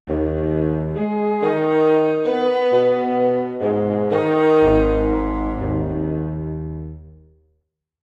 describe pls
Comedy Music Samples 052
- Comedy Music Samples
- Cartoon Music Background
- Action Cartoon Music
- Funny Cartoon Background Music
- Cartoon and Funny Sounds
comedia, humor, dibujos, comedy, cartoons, infancia, film, funny, fun, samples, story, infantil